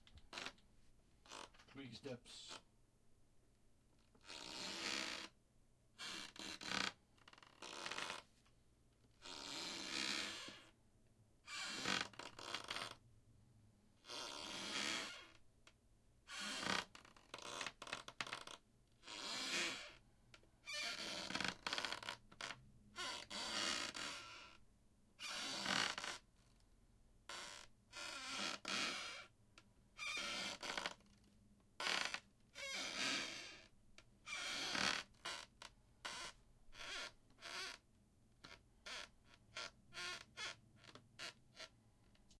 squeaky floor steps 1
squeaky floor & steps h4n & rode mic